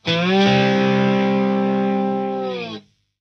Slides-Slide C Fast-2
Guitar slide C 5 chord fast slide.
Recorded by Andy Drudy.
Seaford East Sussex - Home Studio.
Software - Sonar Platinum
Stereo using MOTU 828Mk 3 SM57 and SM68
Start into a Marshall TSL1000
Date 20th Nov - 2015
C, fast, Guitar, slide, Slides